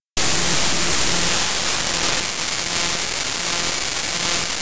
Glitch - Goldeneye
These Are Some Raw Data. Everyone Knows that Trick, Here's My take on it, Emulators (your Favorite old school RPG's), Open LSDJ in Audacity, Fun Fun.
cyber, data, audacity, raw